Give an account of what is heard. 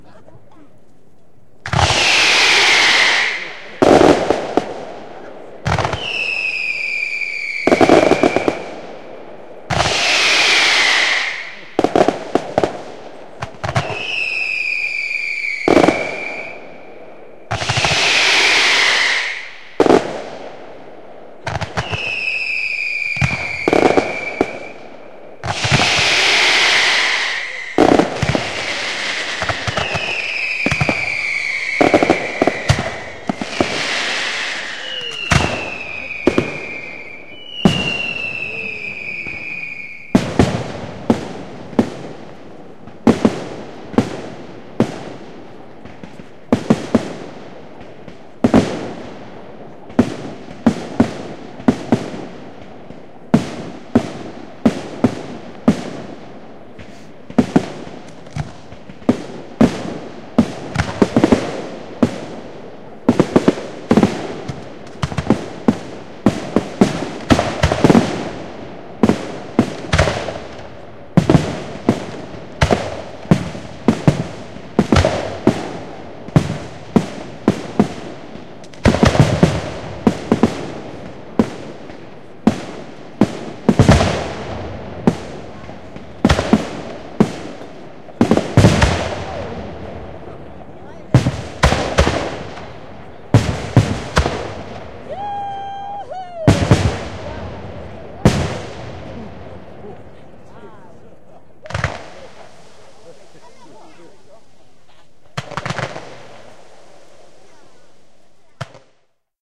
Part of a firework display. Can hear roman candles with screamer units, and the launch and burst of shells. You may wish to turn up the bass and wear headphones! Now geotagged at last - thanks for all the comments!